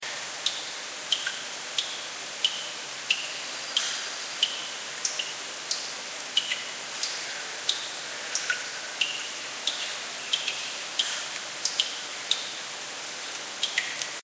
The drops aquaticophone(acuaticófono de gotas) is one of the aquaticophones collection, formed by 8 devices that use real water to run and sound.
water, acuaticofonos, Physics-chemistry-mathematics, campus-gutenberg, water-sounds